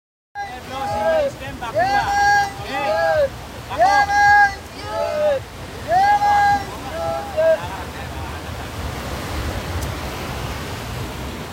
Fishermen pushing boat in Sri Lankan beach
A small group of fishermen were pushing a boat into the sea from the Weligama beach in Sri Lanka. They were almost chanting in unison for mental coordination.
fishermen, field-recording, waves, weligama, sri-lanka, sea, indian-ocean, boat